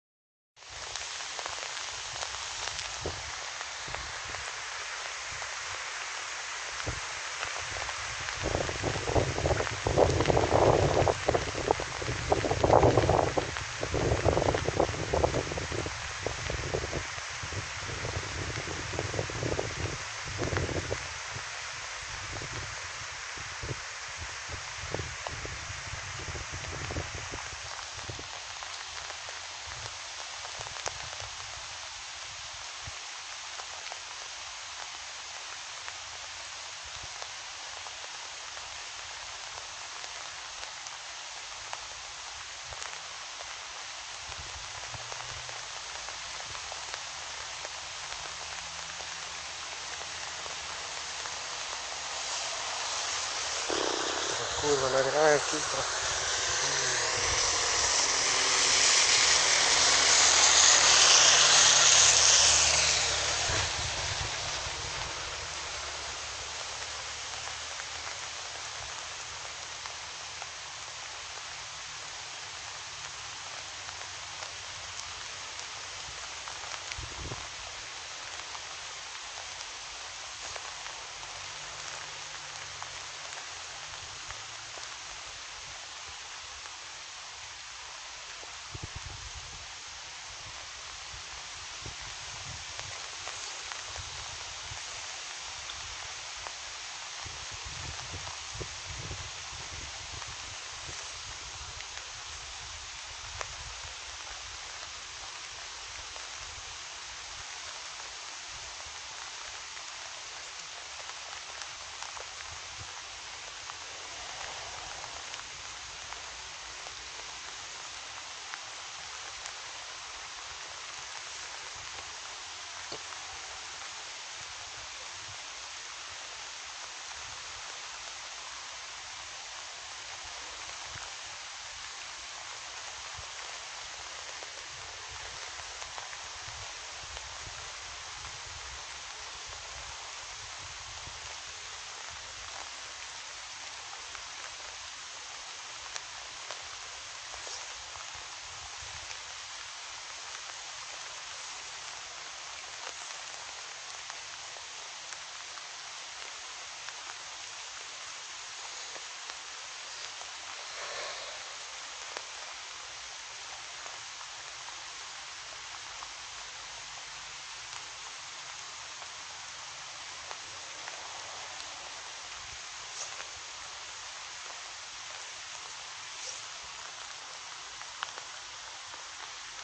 Rain in Bytow
A recording of rain in city named Bytow. Recored with LG K8 LTE
poland, city, rain